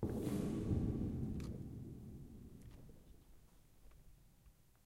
Big sheet strike 5
All the sounds in this pack are the results of me playing with a big 8'x4' sheet of galvanised tin. I brushed, stroked, tapped hit, wobbled and moved the sheet about. These are some of the sounds I managed to create